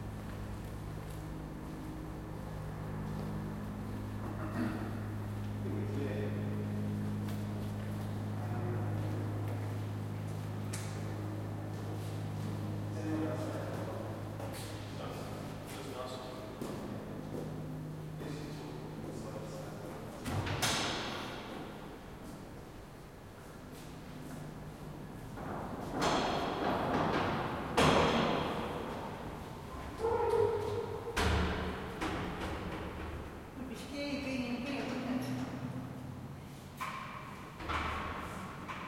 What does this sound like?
Recorded with Zoom H2N in 4CH Surround
Recorded INT Geelong Jail
Victoria, Australia